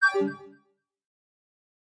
Synth ui interface click button negative 1
button, click, interface, negative, Synth, ui